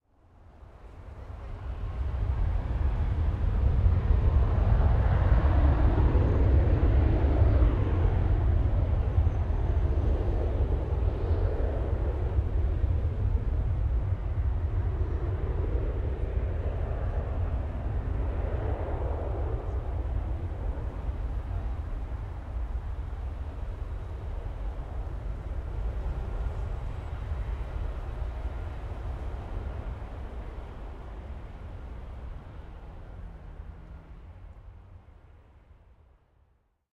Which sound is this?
Takeoff 1 (Distant)
A commercial jet plane taking off (all of these takeoffs were recorded at a distance, so they sound far away. I was at the landing end of the runway).
Aircraft, LAX, Distant, Jet, Flight, Plane, Exterior, Takeoff